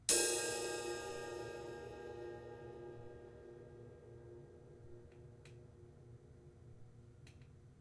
Drum Cymbal being hit